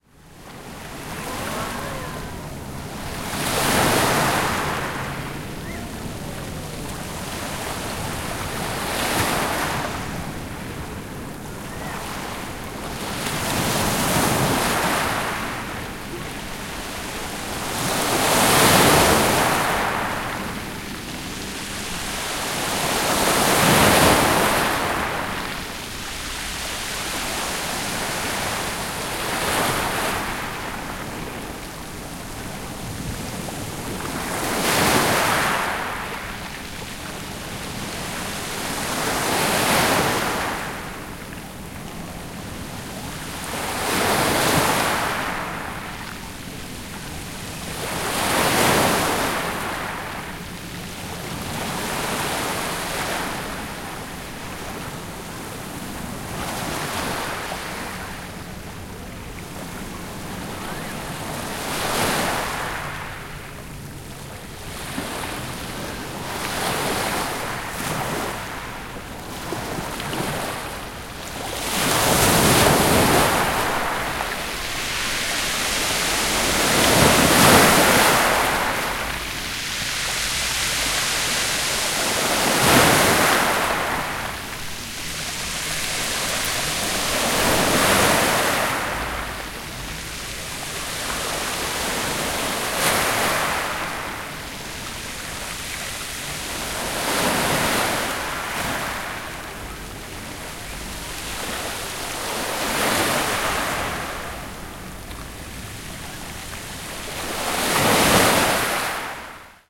Son de la mer Méditerranée. Son enregistré avec un ZOOM H4N Pro et une bonnette Rycote Mini Wind Screen.
Sound of a Mediterranean Sea. Sound recorded with a ZOOM H4N Pro and a Rycote Mini Wind Screen.
seaside, beach, water, mediterranean, waves, south-of-france, wave, sea